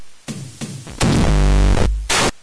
I BREAK IT YOU BUY IT !!! It's a new motto.....
Hehehehe This is a Bent DR 550 MK II YEp it is....
circuit, bent, murder, slightly, bending, dr550, toyed, deathcore, glitch